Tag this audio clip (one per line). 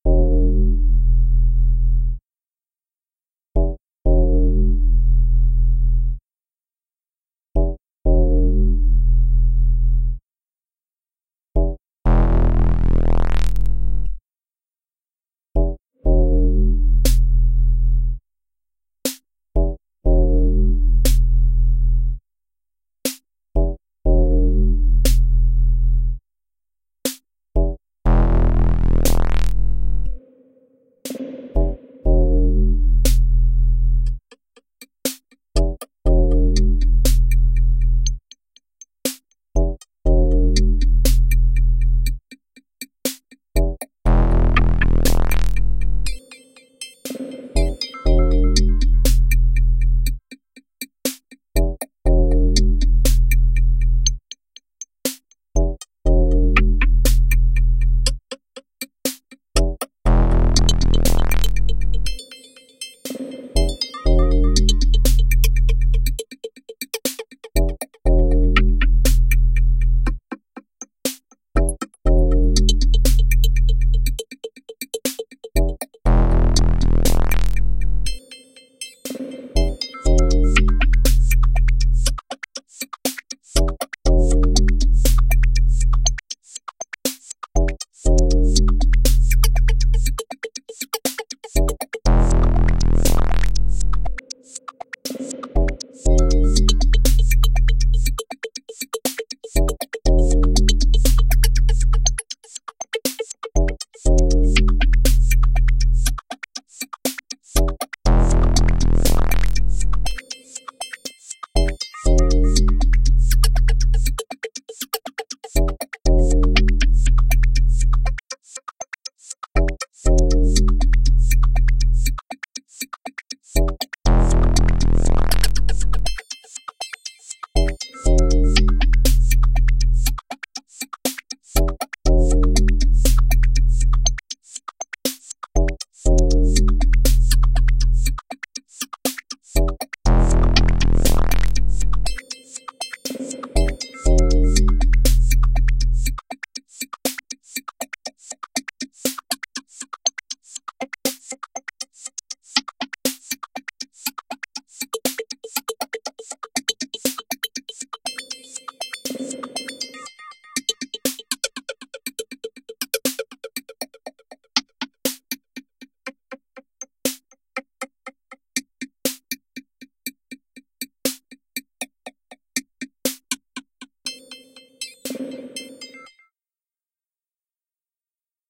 digital
dub
dubstep
echo
loop
minimal
modular
pan
panning
reggae
synth
synthesizer